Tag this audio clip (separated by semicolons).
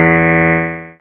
electric-piano; multisample; ppg